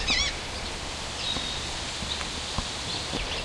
Noisy vinyl sounding loop for all your "skipping beach sound effect record" needs.